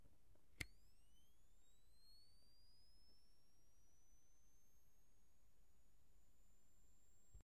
An external camera flash charging
charging, camera, foley, flash, buzz